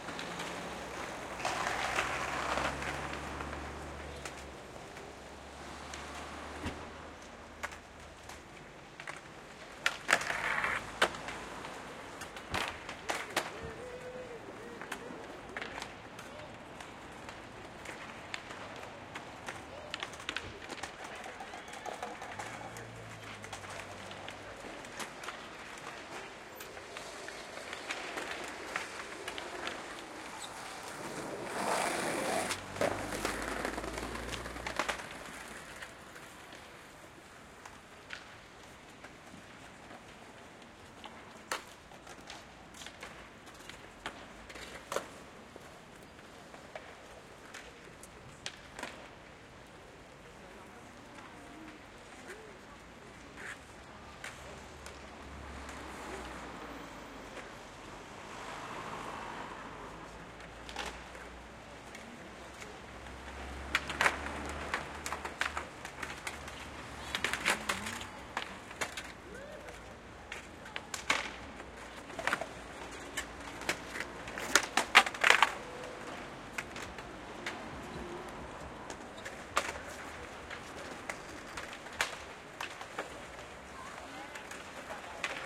Skateboarders, BMX freestylers and roller bladers all having a good time at the skate park in Brussels City Center on this late sunny autumn afternoon. Doens't get much more urban then this. Equipment note: Pearl MSH-10 microphone via Sound Devices 302 field mixer to Sound Devices 702 recorder. MS recording decoded to AB stereo at the mixer stage. Some low cut EQ and limiting applied.
city, skaters, atmosphere, outdoor, citynoise